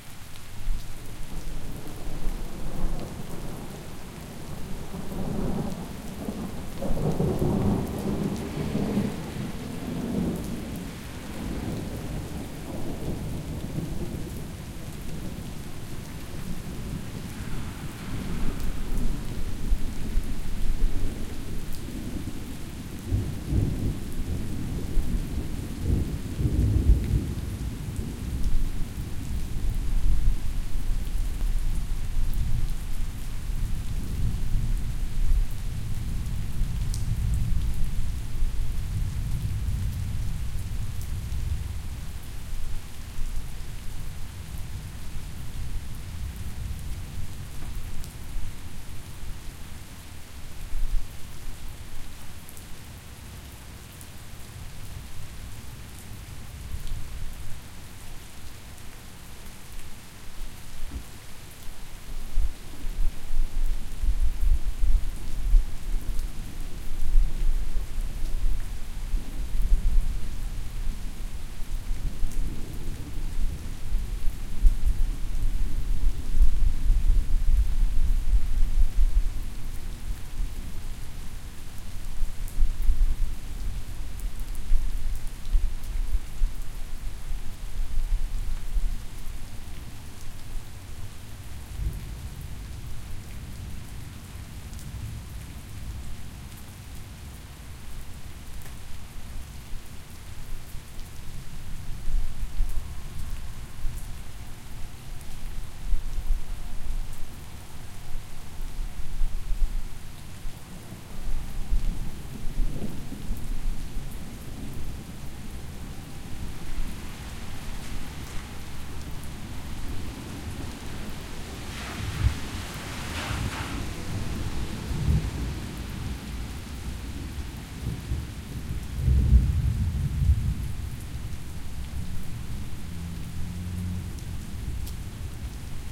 Rainy City
This is actually my favorite sound yet! There was a church clock going off at the time I was recording this, but, alas, I foolishly did not have my windscreen on, and I had to edit it out. Loopable.
city, field-recording, rain